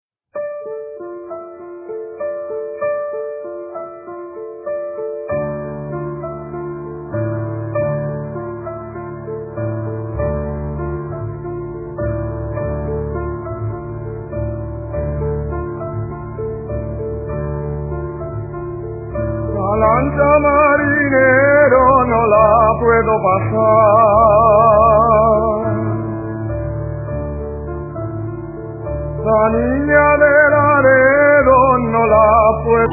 Cuadro hombre 2
ambient, field-recording